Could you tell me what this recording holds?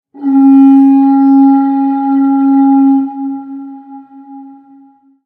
A middle-frequency note that sounds like a Swiss alpenhorn or person blowing into a conch shell. Made by blowing into a short section of PVC pipe.
alpenhorn, clarion, conch, conch-shell, horn, mountain, swiss